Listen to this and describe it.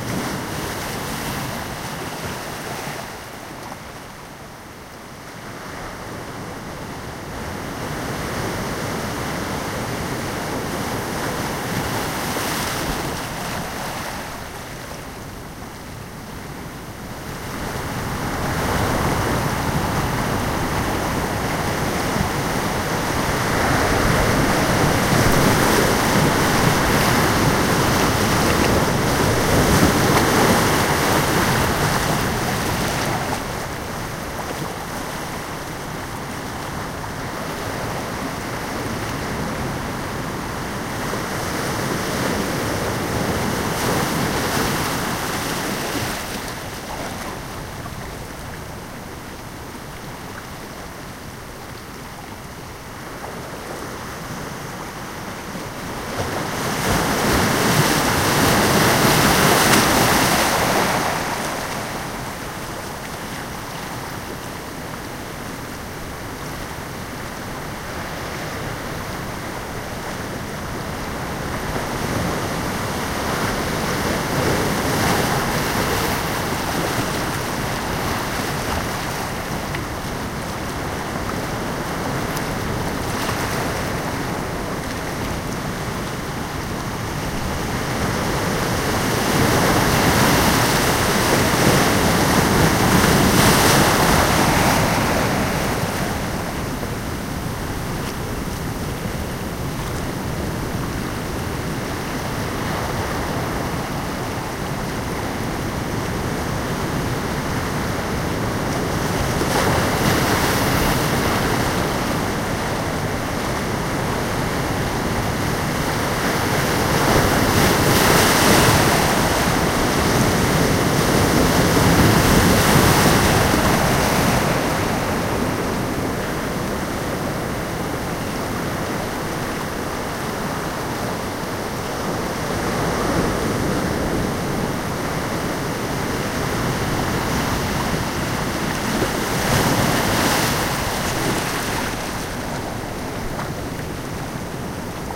Ocean waves at Point Reyes. Edited as a loop. Using a Sony MZ-RH1 Minidisc recorder with unmodified Panasonic WM-61 electret condenser microphone capsules.
beach, field-recording, loop, ocean, Point-Reyes, sea, seashore, slosh, splash, stereo, water, waves